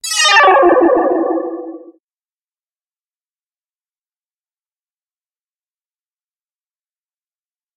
imaginary sound of a UFO passing by,
or anything falling from the sky.
laser, sci-fi, ufo